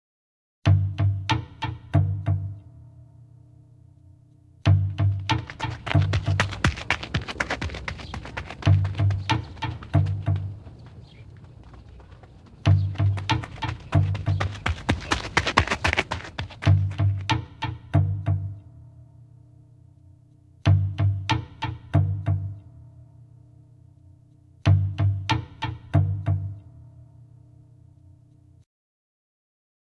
Pianostring steps
Recording of piano strings beat with runaway steps.